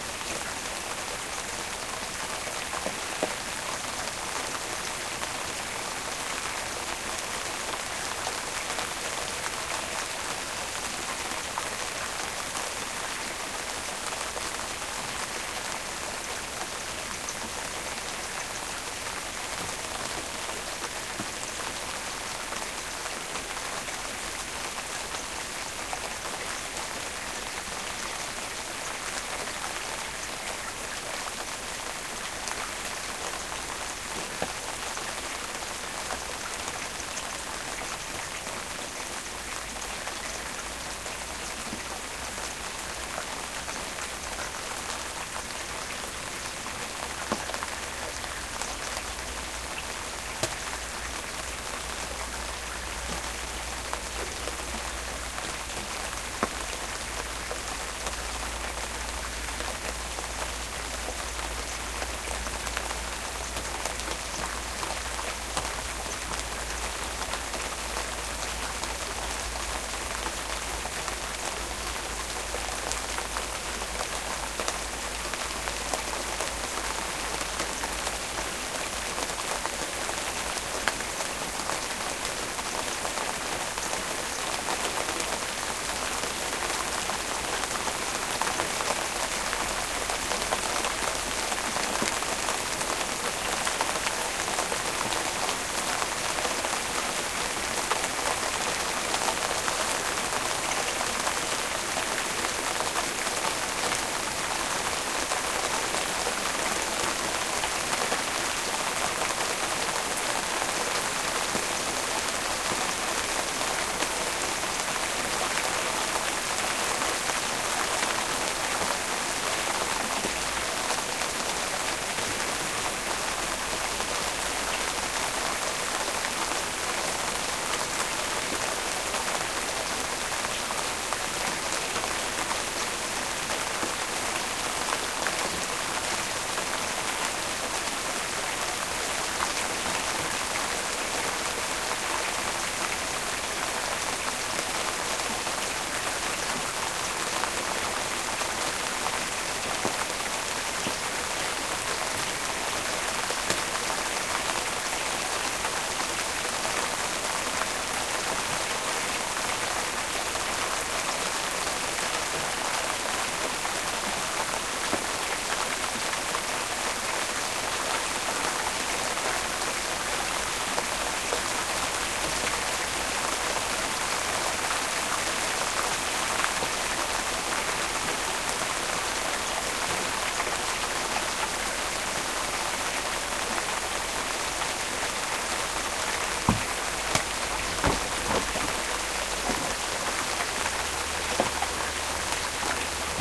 heavy rain 210511 0081
its raining heavily in the night.
ambient, field-recording, nature, rain, weather